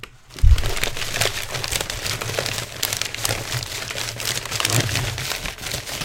Paper bag
bag, crumble, paper, wrapping